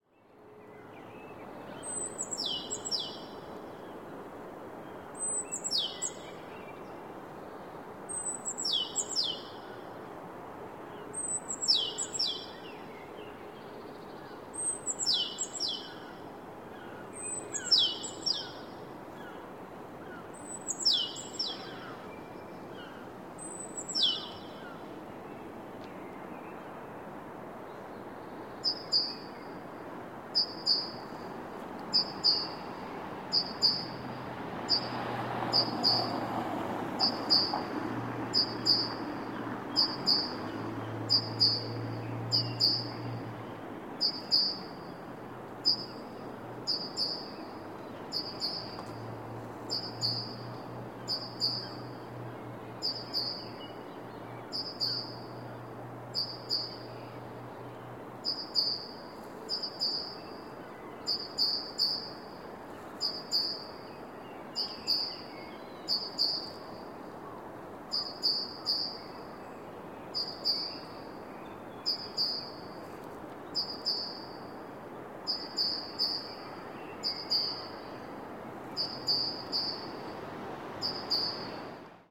bird in the Hague at dawn 3
Bird singing in a city park of the Hague at dawn. Recorded with a zoom H4n using a Sony ECM-678/9X Shotgun Microphone.
Dawn 09-03-2015
birds, city, dawn, field-recording, hague, netherlands